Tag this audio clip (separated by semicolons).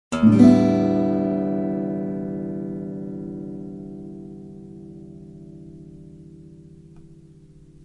instrument; string; guitar